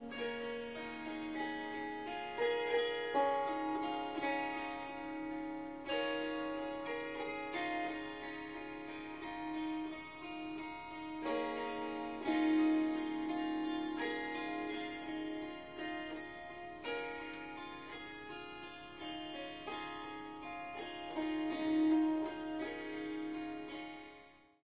Harp Melody 2
Melodic Snippets from recordings of me playing the Swar SanGam. This wonderful instrument is a combination of the Swarmandal and the Tanpura. 15 harp strings and 4 Drone/Bass strings.
In these recordings I am only using the Swarmandal (Harp) part.
It is tuned to C sharp, but I have dropped the fourth note (F sharp) out of the scale.
There are four packs with lots of recordings in them; strums, plucks, short improvisations.
"Short melodic statements" are 1-2 bars. "Riffs" are 2-4 bars. "Melodies" are about 30 seconds and "Runs and Flutters" is experimenting with running up and down the strings. There is recording of tuning up the Swarmandal in the melodies pack.
Strings, Melody, Riff, Swarsamgam, Swar-samgam, Melodic, Ethnic, Swarmandal, Harp, Indian, Surmandal